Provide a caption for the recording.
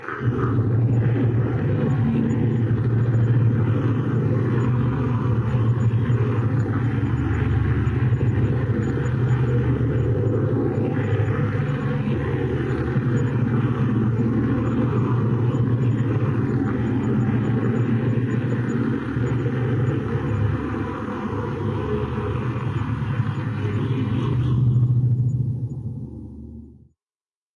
drone sound 03
Room tone for the operations area of a spaceship in a science fiction movie. Various drones processed in Samplitude.
ambience, cockpit, drone, room, tone